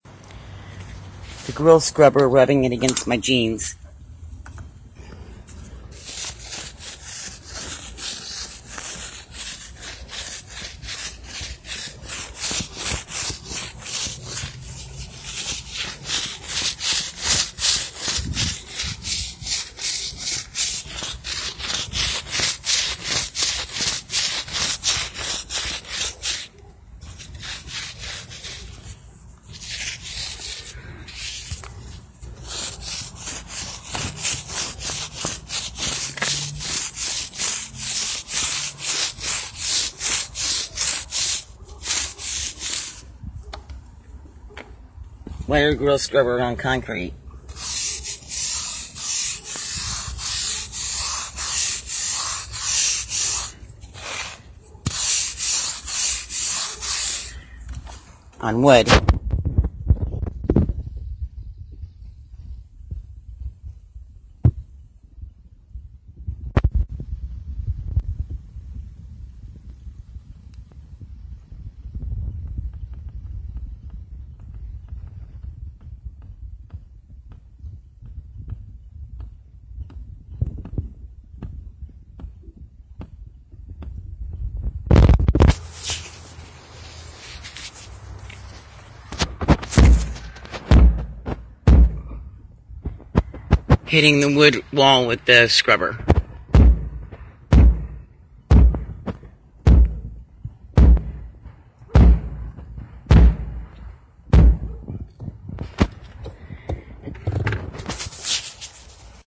Metal scrubber against jeans
rubbed the leg of denim jeans with a metal toothed grill scrubber, recorded using a Sennheiser mic, outside.
metal, rubbed, bizarre, friction, rub, technica